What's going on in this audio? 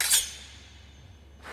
Sword Slice 10
Tenth recording of sword in large enclosed space slicing through body or against another metal weapon.
movie, slash, sword, slice, foley